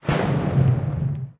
tro bassPan
bass explosion with panorama effect from left to right
explosion, field-recording, bass, fireworks, pan